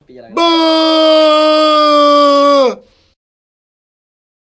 abnormal scream
666moviescreams, abnormal, funny, scream, stupid